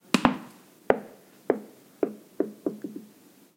Bouncing Ball
The sound of a ball bouncing or hitting a wall.
sport, floor, bounce, fun, wall, ball